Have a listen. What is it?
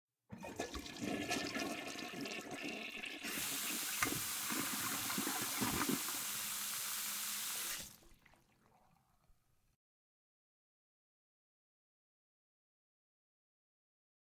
Toilet Flush - Sink on
My Toilet flushing and sink running. Recorded with a Shotgun mic into an H4N.
toilet, flush, water